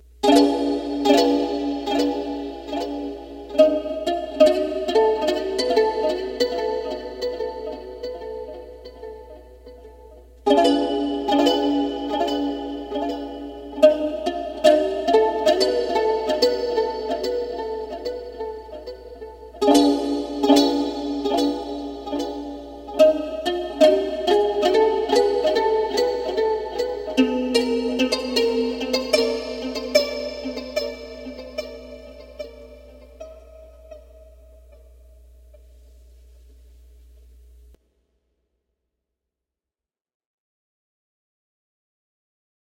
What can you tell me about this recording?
Ukulele with a boss chorus and delay pedal. Reverb added, as well as Time shift in the right channel to add stereo effect
delay, processed, ukulele